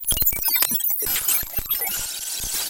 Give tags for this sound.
computer computing funny future movie overload spaceship synth

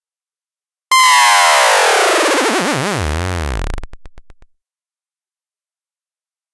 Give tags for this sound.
broadcast chord deejay dj drop dub-step effect electronic fall fx imaging instrument instrumental interlude intro jingle loop mix music noise podcast radio radioplay riser send sfx slam soundeffect stereo trailer

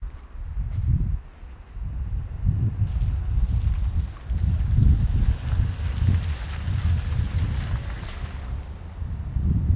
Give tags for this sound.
car puddle splash water

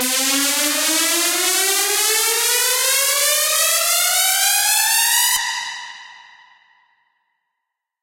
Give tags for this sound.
Fast Pad dvizion Drum Melodic Vocal Rythem Lead 179BPM Beat Vocals Synth DrumAndBass Loop DnB Heavy Dream DrumNBass Drums Bass

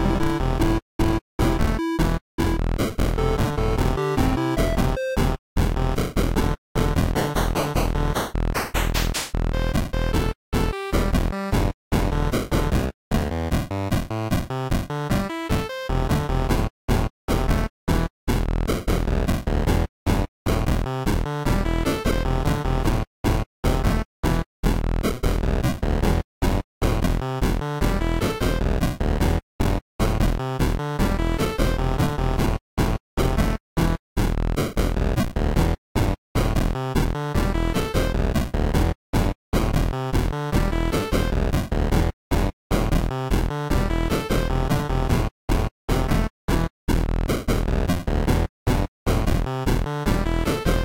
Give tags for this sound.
8-bit techno loop

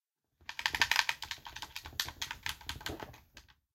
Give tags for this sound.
controller xbox buttons

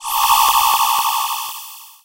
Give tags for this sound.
film retro animation video cartoon nintendo games game 8bit video-game magic movie arcade

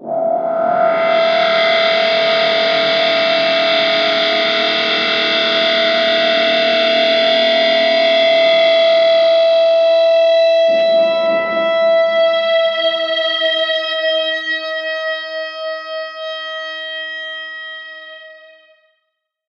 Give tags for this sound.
abstract absynth ambient sculpture uad

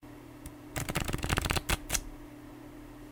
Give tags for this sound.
games cards deck shuffling shuffle riffle poker gambling card

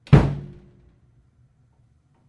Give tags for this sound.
bass kit drum

